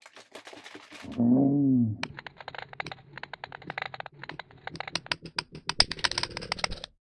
Sound of flight taking off.